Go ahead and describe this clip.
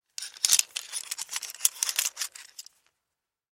Studio recordings of handling a small metal mechanical device for foley purposes.
Originally used to foley handling sounds of a tattoo machine, but could also be used for guns, surgical instruments etc.
Recorded with an AT-4047/SV large-diaphragm condenser mic.
In this clip, I am handling the device, producing diverse clinks and ratcheting sounds.